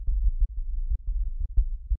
I wanted to create some synth tracks based on ancient geometry patterns. I found numerous images of ancient patterns and cropped into linear strips to try and digitally create the sound of the culture that created them. I set the range of the frequencies based on intervals of 432 hz which is apparently some mystical frequency or some other new age mumbo jumbo. The "Greek Key" patterns in my opinion where the best for this experiment so there are a bunch of them at all different frequencies and tempos.